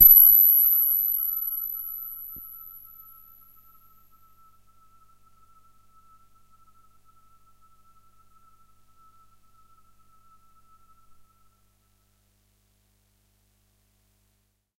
This is a sample from my Q Rack hardware synth. It is part of the "Q multi 011: PadBell" sample pack. The sound is on the key in the name of the file. A soft pad with an initial bell sound to start with.
synth, waldorf, pad, bell, bellpad, multi-sample, electronic